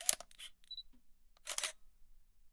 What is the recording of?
Camera Shutter Lens
Shutter sound of the t3 Canon Rebel.
strange, filters, sounddesign, sound, Recorder, Pictures, no, Shutter, effect, sound-design, Camera, Canon, Shot, Beep, Lens, sfx, electric, nice, future, abstract, noise, fx, real, Stereo